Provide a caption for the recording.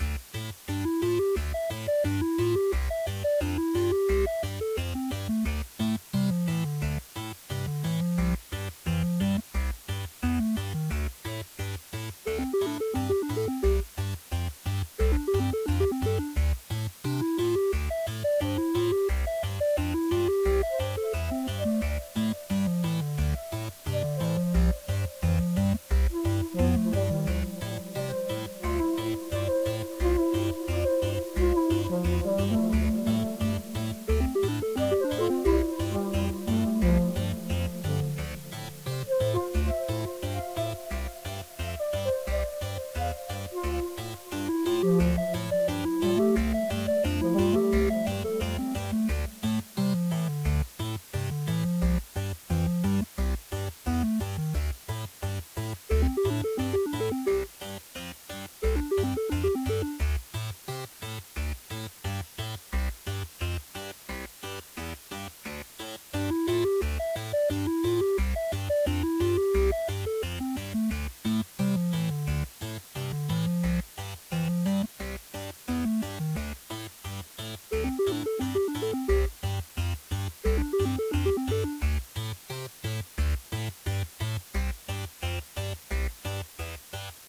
Lost Moon's -=- Anti Gravity Burial
2013, 8bit, blix, chip, cosmic, laboratory-toy-toons, nintendo-sounding